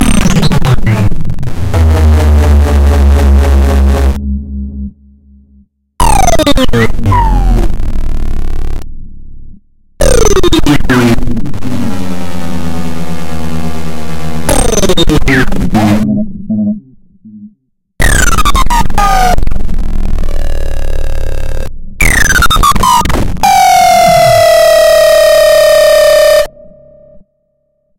sci-fi impacts 1
A bunch of synth sounds with a si-fi spaceship/super weapon feel
cannon, cinematic, design, effect, gun, impact, laser, oneshot, powerful, sci-fi, sfx, sound, super, synth, transformers, weapon